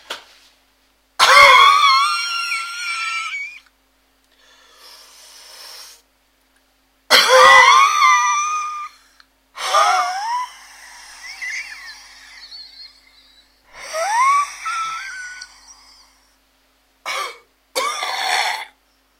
Whiny cough 1
1, cough, Whiny